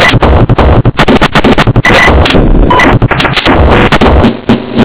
Loop-Glitch#08
In order to make the samples used here - most of which are only hundredths of a second long - I took samples from the Amen kit, then switch the format from >PCM 22.050 kHz, 8 Bit, Mono< into >ADPCM 11.025 kHz, 4 Bit, Stereo< then back to >PCM 22.050 kHz, 8 Bit, Mono< again.I found that if you do this with a sample under 00.35 seconds long, you get an almost random glitch sound, about .04 seconds long, that barely sounds like the original sound.